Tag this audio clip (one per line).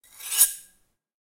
blade
cook
cooking
couteau
cuisine
food
kitchen
knife
knife-slash
slash
slice
slicing